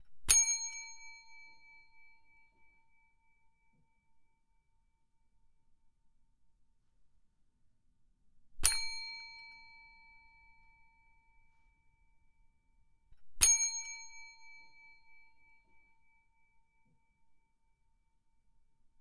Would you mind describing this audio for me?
Bell 6inch away
Traditional style hotel porters hotel bell often found on desks of hotels. Recorded 6 inch away with a Rode NT1
button, porters, push, bell, hotel, porter